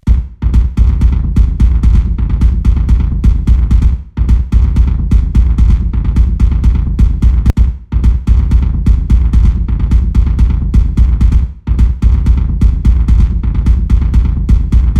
Experimental Kick Loops (9)
A collection of low end bass kick loops perfect for techno,experimental and rhythmic electronic music. Loop audio files.
kick, loop